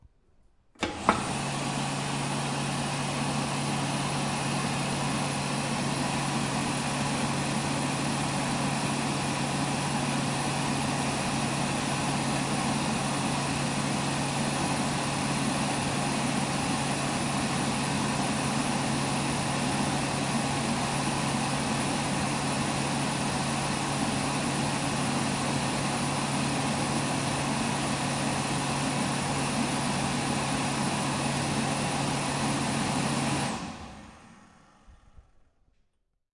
Toilet drier
Sound of the the whole process of a bathrooms hands-drier. First the action mechanism and then the sound of the fan.
It sounds very noisy and constant.
Recorded with a Zoom H2 in a cardiod polar pattern with low sensitivity and at 50 cm of the source in a public bathroom of the building 54 of the Universitat Pompeu Fabra in Barcelona